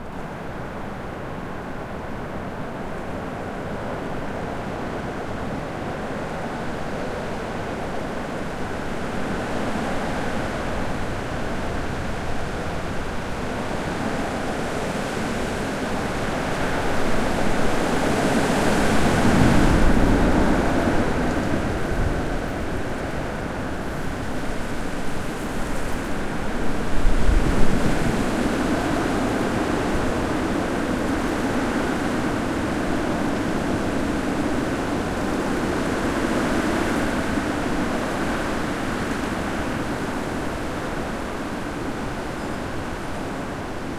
Recorded with Tascam DR-44WL on a windy day in my backyard. Hiding behind a fence using a hat as a wind shield.
Stereo widening using hard pan and 5ms delay (so the first impulse of the sound is a bit weird)